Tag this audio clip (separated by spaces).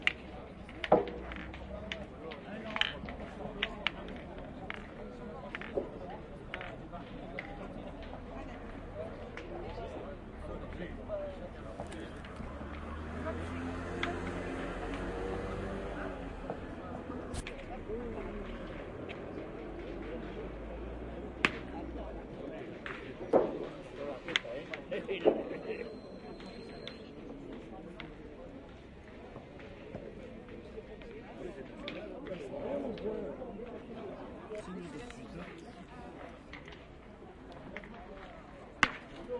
boules field-recording france